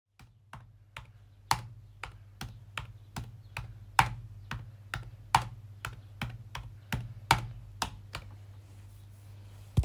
TYPING TINY
Slow typing on a MacBook Pro, clear and simple.